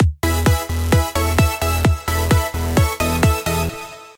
All sounds (minus the kick drum) were created using Spire and processed using third party plug ins.